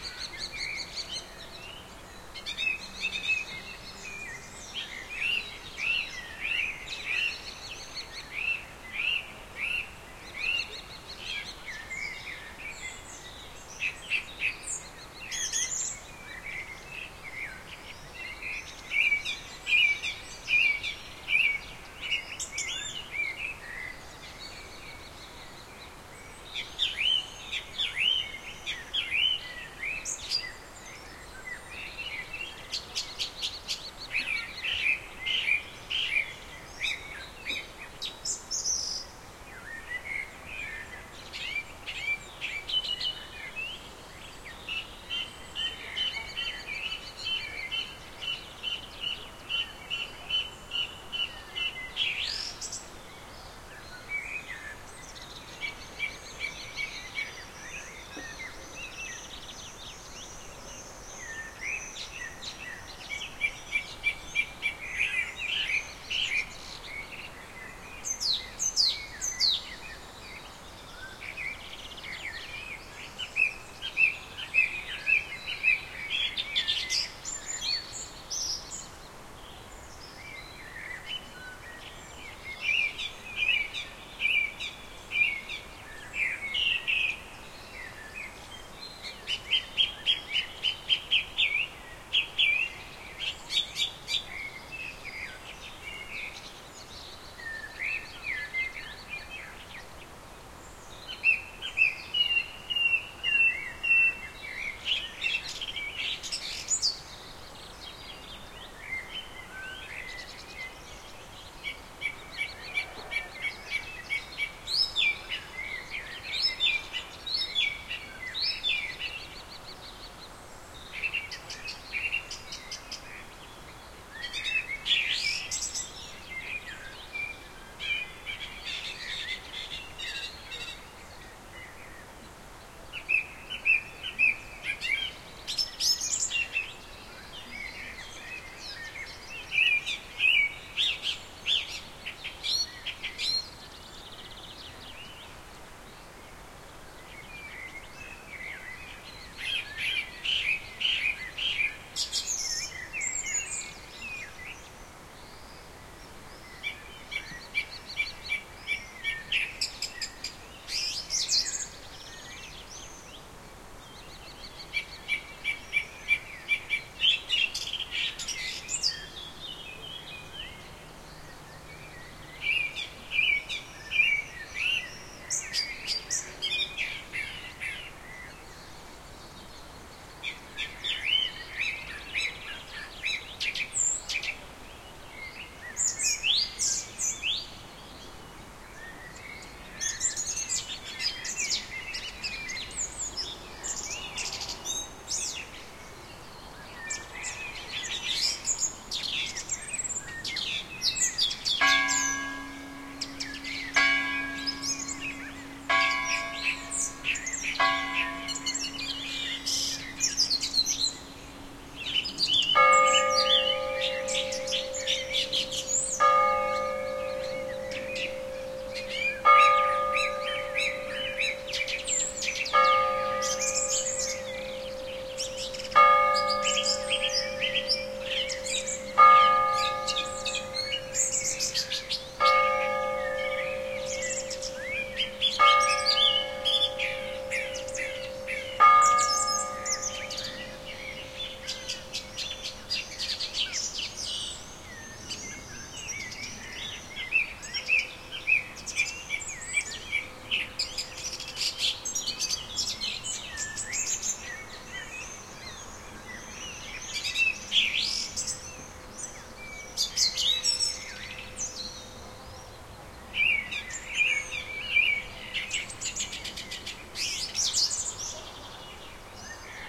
A summer evening in a vineyard by the German town of Freyburg on Unstrut.
The recording abounds with natural background noises (wind in trees, birds, insects). In the foreground, a little bird is singing, giving it all it has. I am no bird expert, but if somebody knows what species it is, I'd love to know.
At 3:22 into the recording, the tower bells of the keep of Neuenburg Castle, located on the hill opposite, toll 9 o'clock. They do this by tolling a high bell 4 times, once for each quarter of the full hour, followed by a lower bell tolling the hour 9 times.
The recorder is located on the top of the vineyard, facing across the valley between vineyard and castle.
These are the REAR channels of a 4ch surround recording.
Recording conducted with a Zoom H2, mic's set to 120° dispersion.